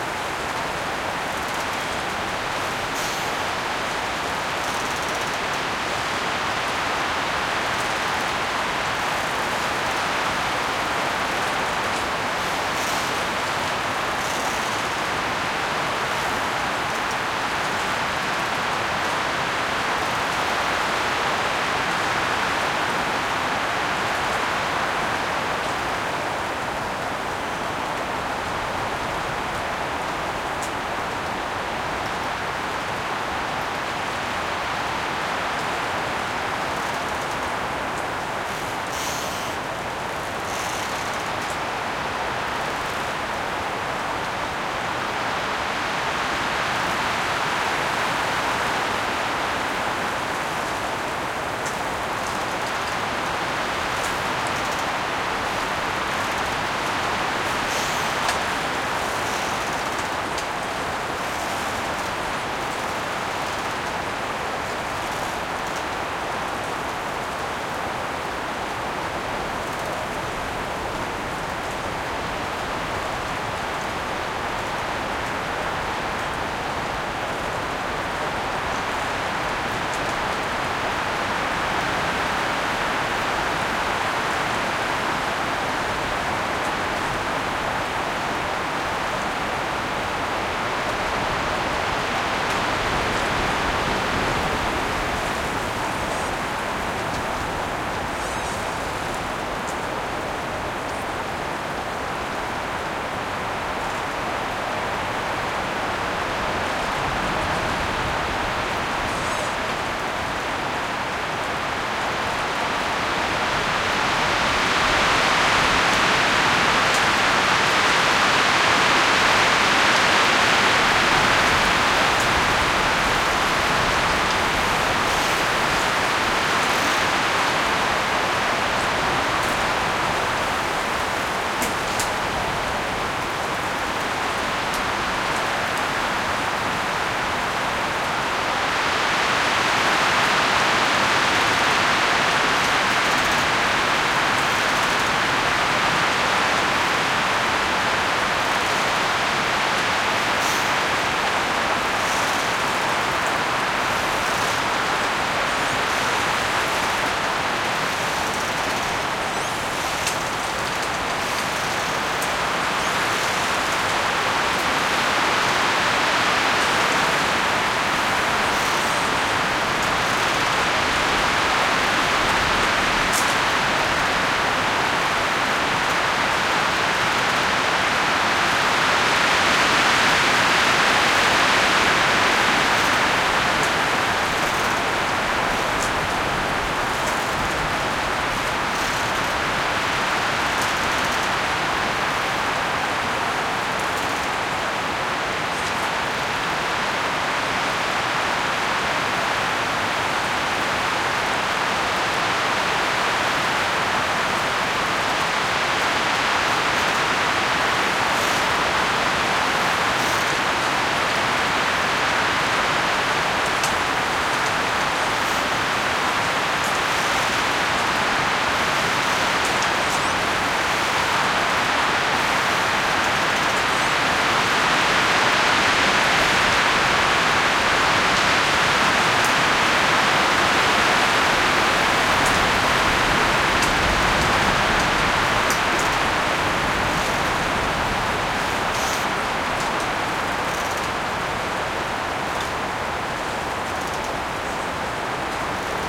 windy pine forest (strong)
A windy day in a small pine forest where you hear the gales swaying the trees. Recorded with a Tascam DR100 MK3 with primo omni microphones.
field-recording, forest, gale, gust, omni, stereo, trees, wind, windy